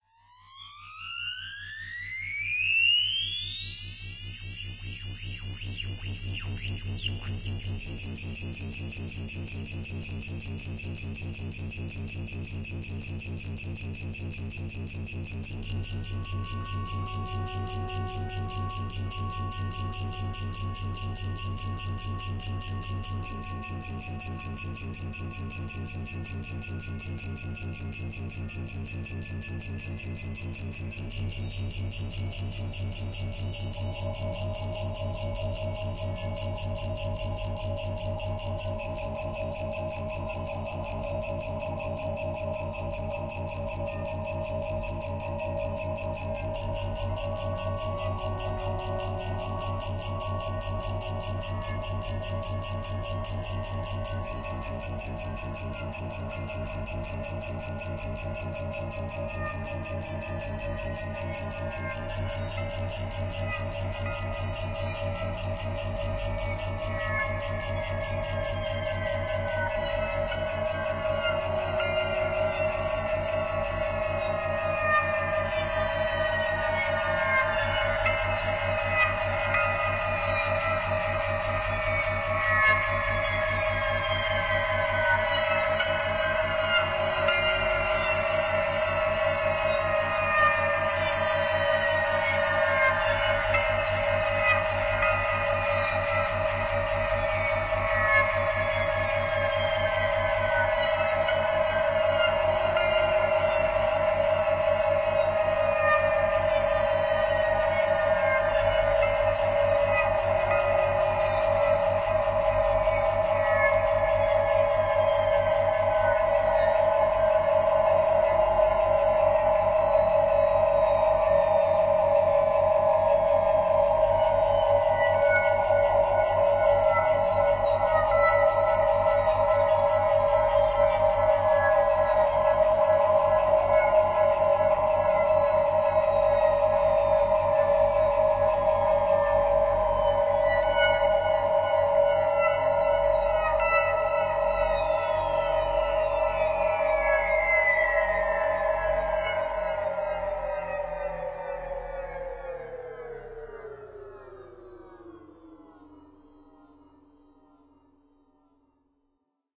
Pad amb 1
Ambient, breakbeat, Dark, Darkwave, Easy, Experimental, Listening, new, Noise, NoiseBient, Noisecore, Psychedelic